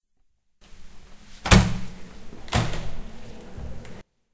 This sound is when someone closes the library's door. This sound is different from the other sounds made when you close other doors, because the door is more hermetic and it opens with a lever.
campus-upf, library, UPFCS12